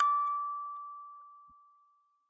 clean re 1
eliasheunincks musicbox-samplepack, i just cleaned it. sounds less organic now.
clean, metal, musicbox, note, sample, toy